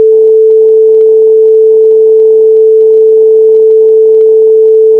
Sin[2*Pi*440*t] for t=0 to 5
mathematic, formula